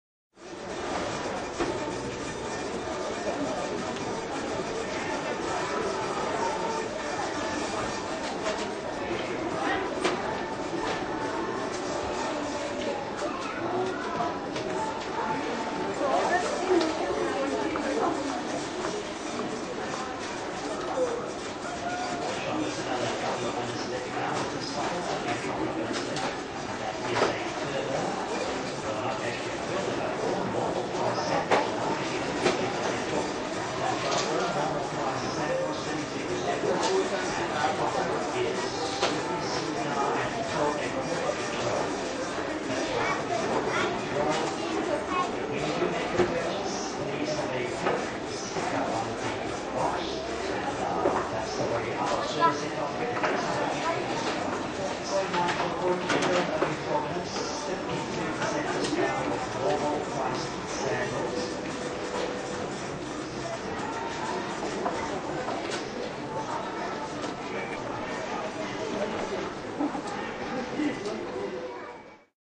Recorded on July 26, 2002 close to the movie isle at Webster's Department Store.
This is how the webster's checkout area sounded from 2002 to 2007.
Note: this is a little shorter than the others.
This is part of a pack of 4k sounds recorded over 17 years commemorating my friend's department store which she owned.
It showcases how the sounds in the store have changed over the years as the technology of the store changes.
Each recording is done within a week after the cash registers are upgraded.
As of the date of this posting, Webster's is now closed, and will re-open as a WalMart in January of 2016.